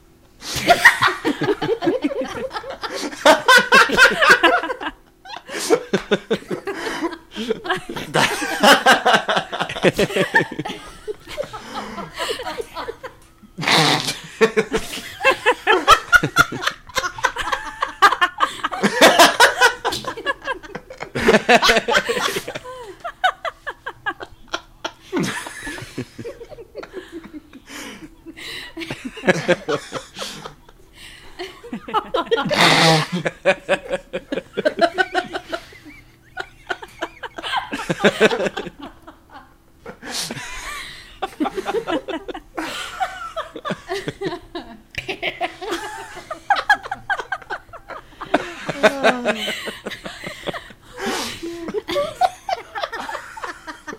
crowd laugh
A group of people laughing together.
crowd, female, foley, laughing, laughter, male